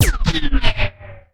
Neuro Glitch
This one is a serum preset made with a glitchy percussion sample, and resampled with a filter lfo
I have to say that I have a bunch of similar sounds like this jeje
generator, Synthetic, Factory, Sci-Fi, Weird, Machinery